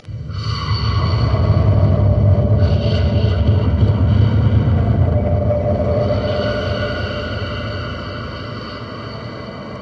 Whispering Desert Storm Horror
Vocal: Mr ROTPB
Recorded Tascam DR-05X
SFX conversion Edited: Adobe + FXs + Mastered
Ambient, Atmosphere, Cinematic, Creatures, Dark, Desert, Field, Film, Man, Movie, Noise, SFX, Sound, Sound-Effects, Storm, Vocal, Wind